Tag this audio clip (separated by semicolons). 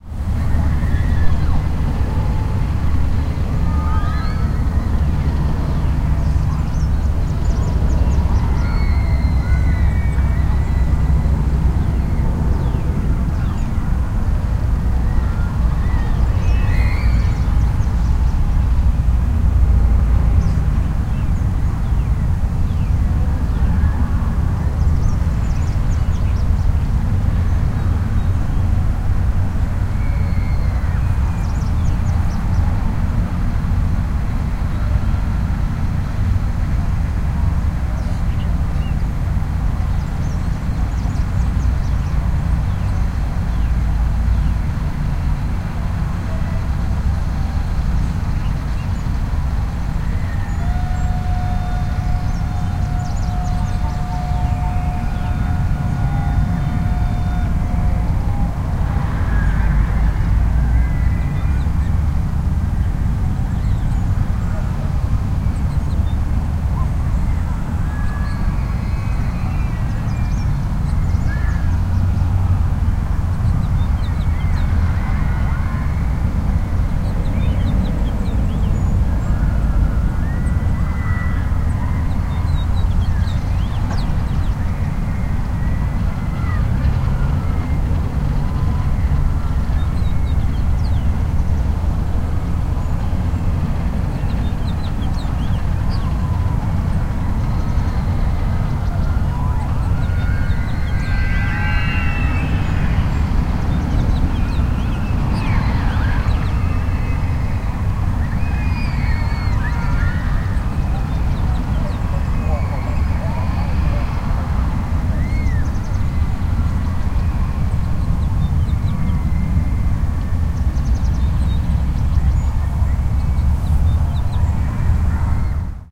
ambient; ambience; screams; amusment; garden; field-recording